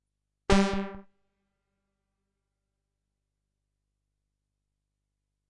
seq-sawbass mfb synth - Velo127 - 055 - g2
A sawbass sound recorded from the mfb synth. Very useful for stepsequencing but not only. Velocity is 127.